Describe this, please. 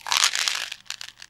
pills in a jar 01 shuffle 04
jar of pills shaken.
shake, noise, one-shot, bottle, pills